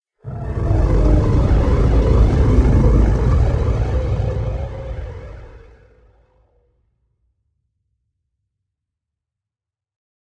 Space monster Roar
Roar of an other worldly monster
Recordist Peter Brucker / recorded 5/20/2018 / shotgun microphone / edited in Logic Pro X
alien, creature, horror, monster, roar, sci-fi, snarl, space, war